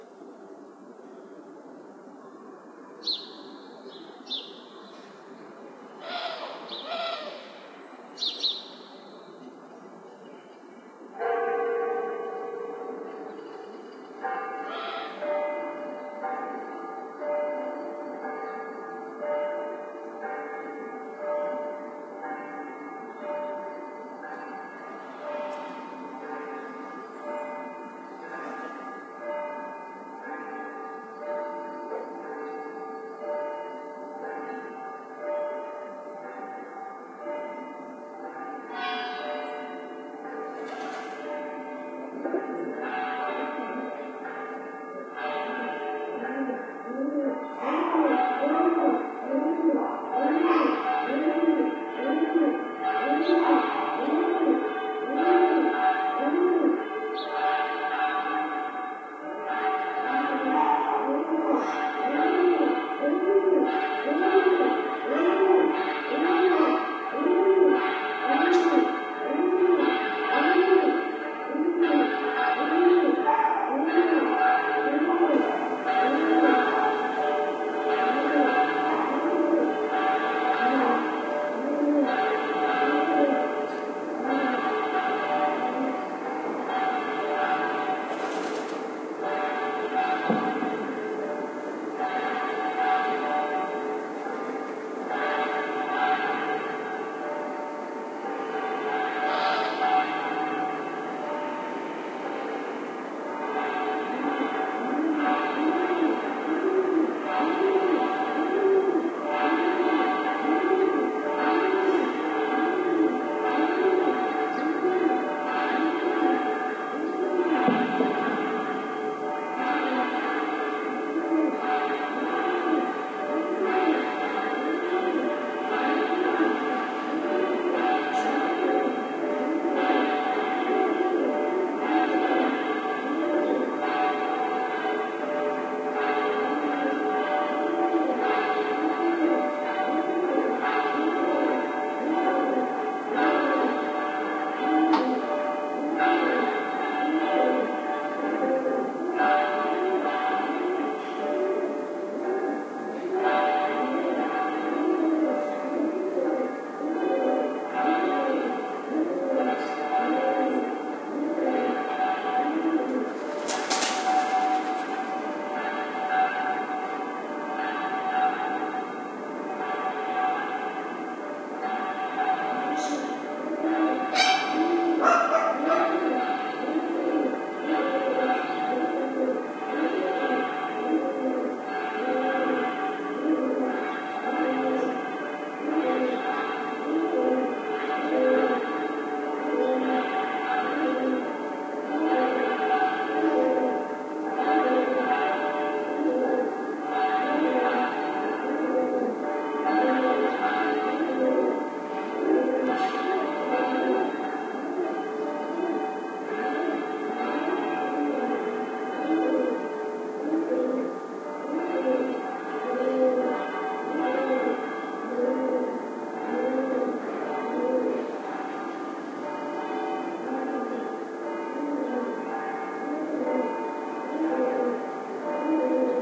old.town
ambient of old town, including sound of birds (first to last: sparrows,
one collared dove, pigeons), barking dogs, bells at varying distances,
and a couple firetrackers. Amplified, with hiss and traffic rumble
removed/ ambiente de ciudad vieja, con pájaros (gorriones, una tortola turca, palomas), ladrido de perros, campanas a diversas distancias y un par de cohetes. He amplificado y despues quitado el ruido de trafico y el siseo de fondo.